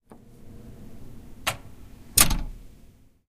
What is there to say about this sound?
Office Door Close
Door being shut
door, close, wooden